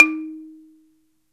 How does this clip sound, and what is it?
A balafon I recorded on minidisc.
percussive, africa